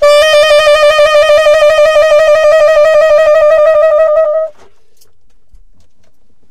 TS semitone trill d4
jazz, sampled-instruments, sax, saxophone, tenor-sax, vst, woodwind